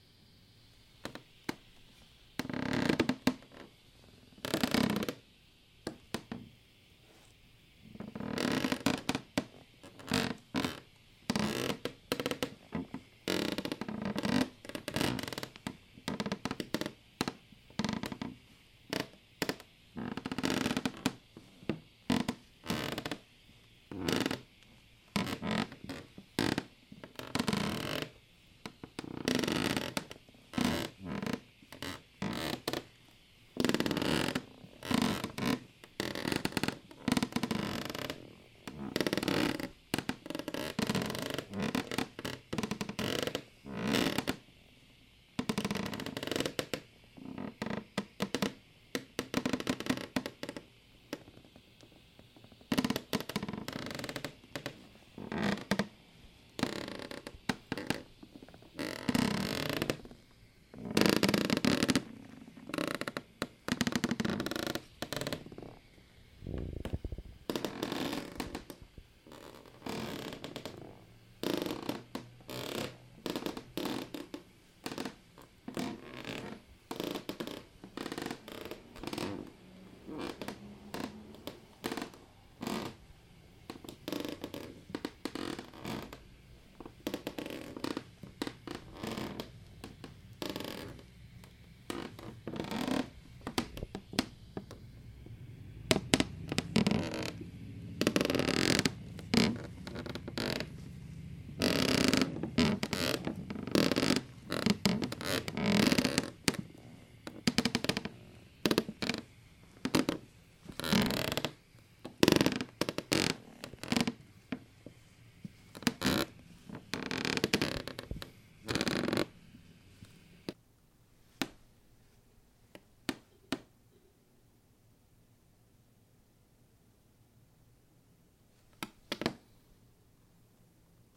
Foley of creaking floor boards, take 1.
I'd also love to hear/see what you make with it. Thank you for listening!